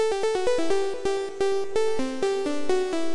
Event Horizon
loop, edm, club, 128, rave, trance, techno, synth, bpm, house, electro